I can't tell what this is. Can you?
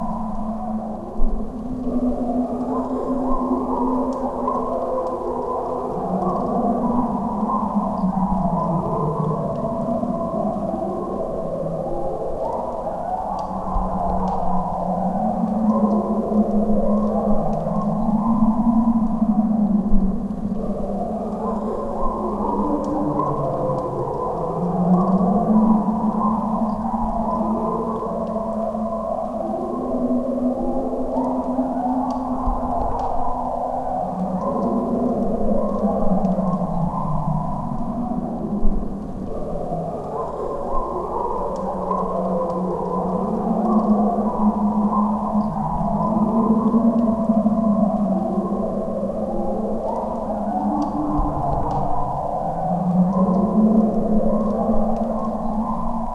An oppressive wilderness soundscape to convey the intense fear of being alone in darkness surrounded by wild animals.